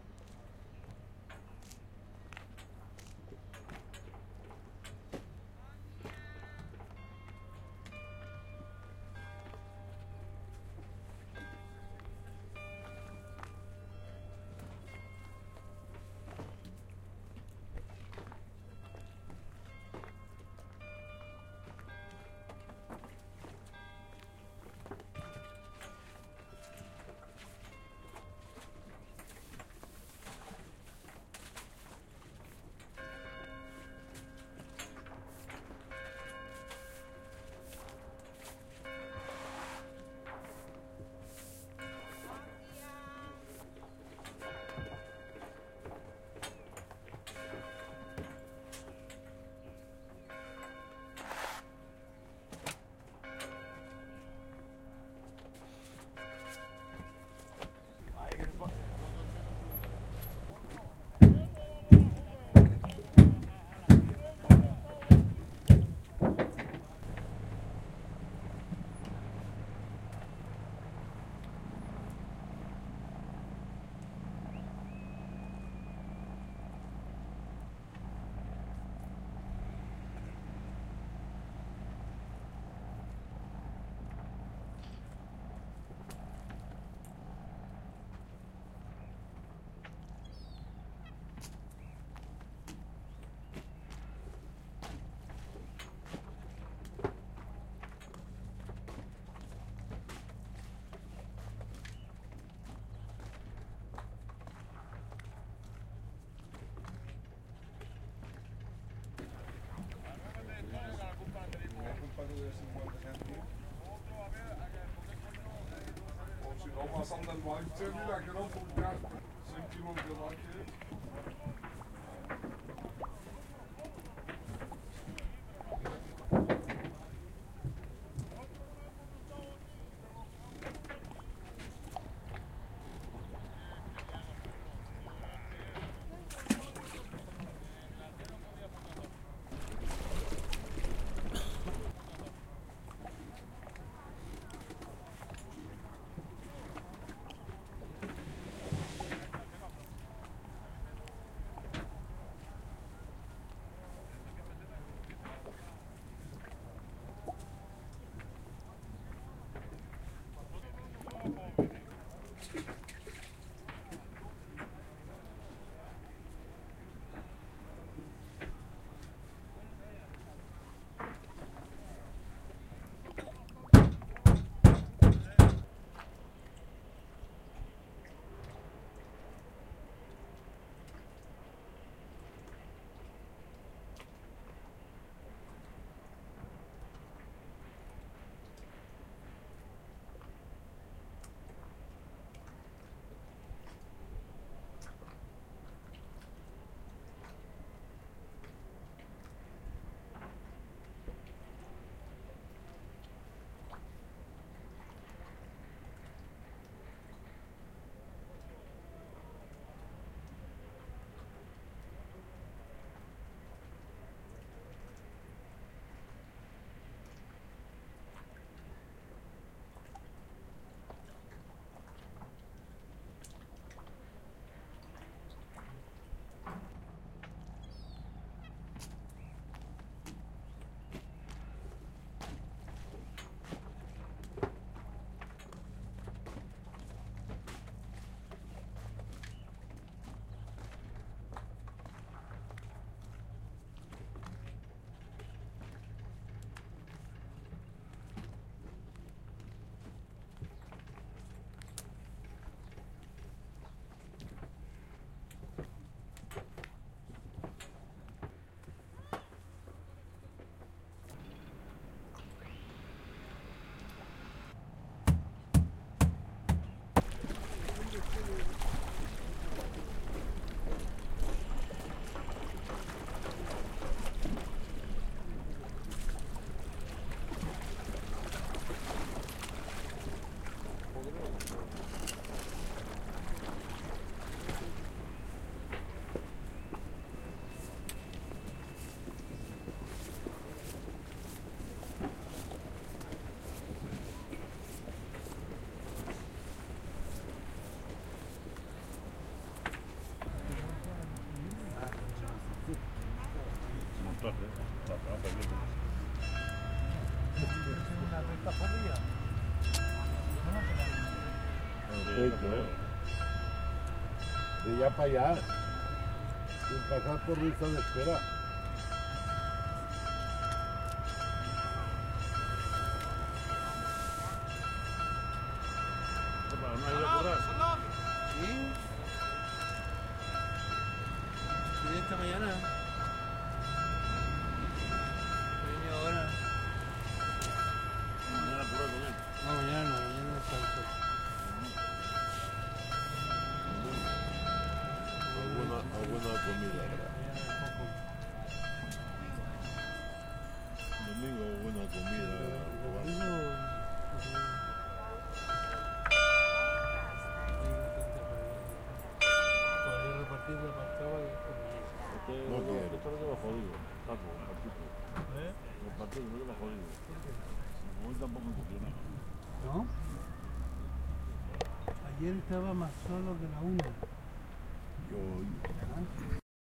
Paisaje sonoro Grupo 2
The soundscape is the result of the sum of different sound effects recorded at the port, edited and processed with Logic.
boat, conversations, hammer, motor, water